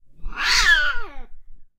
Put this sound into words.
Cat, Screaming, A
I heard a cat crying outside my house at 3AM. Opened the window. He screamed at me. Quickly closed the window.
An example of how you might credit is by putting this in the description/credits:
The sound was recorded using a "H6 (XY Capsule) Zoom recorder" on 11th January 2018.
angry, cat, meow, pain, scream, yell